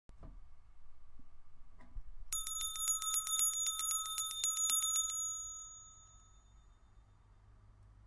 Bell, ringing, ring
Bell, ring, ringing